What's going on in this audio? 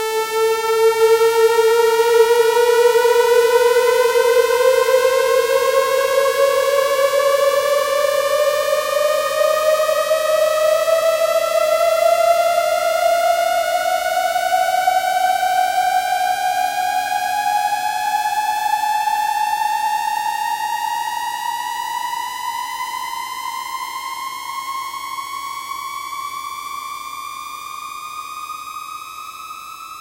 sawtooth+reverb=siren